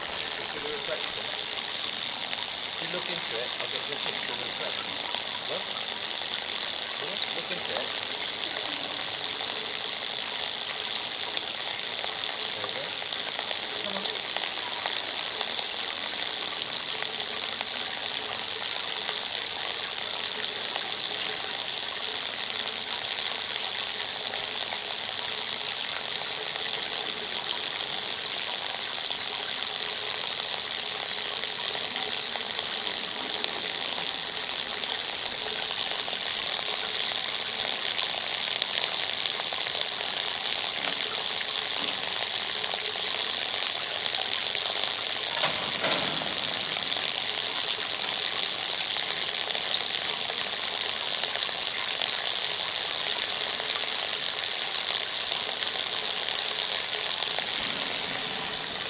Field recording of the Salisbury cathedral font spilling water onto a wire mesh in the floor grating.
Cathedral, Field-recording, Fountain, Grating, Salisbury, Water, Wire-mesh